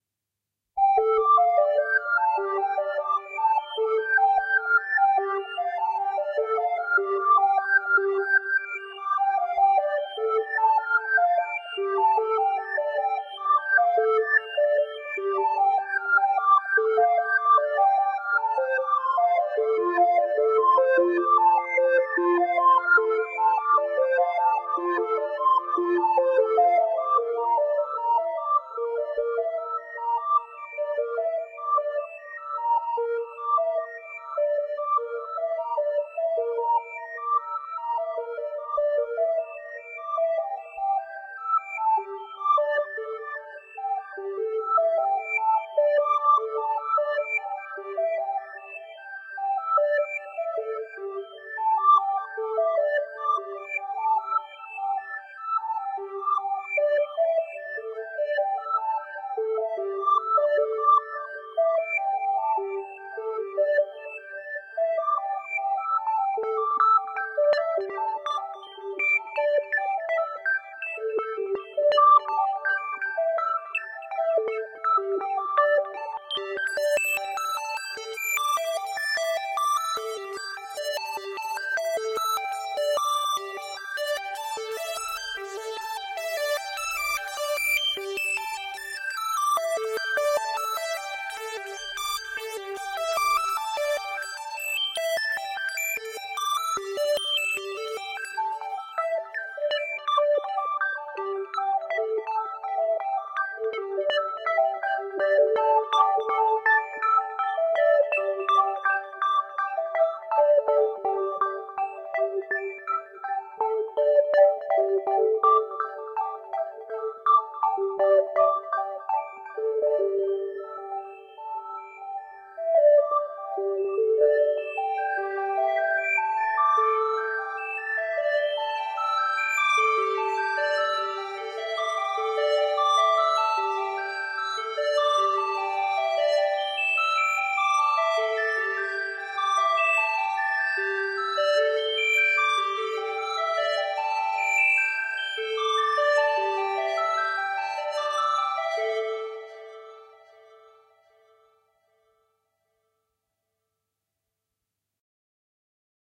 A longish synth Arp for anyone to use, pitch shift or mangle if you will, done using a vst called "Easy Jupit8r" and waves abbey road tape simulator